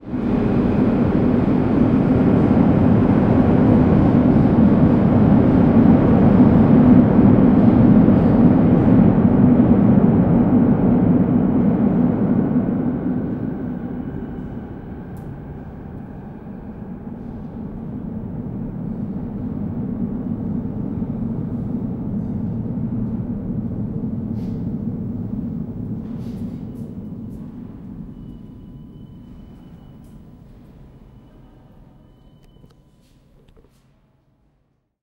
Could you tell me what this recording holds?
This is a sound I recorded at "Bobigny-Pantin-Raymond Queneau" subway station in the outskirts of Paris. There is a very interesting acoustical phenomena happening there each time a train leaves the station haeding to Bobigny: a few seconds after the train has left the platform, a huge, dark and overwhelming "roar" can be heard in the tunnel. I don't think this is unique, but it certainly is fun to listen to (!) Recorded with a zoom h2n in X/Y stereo mode.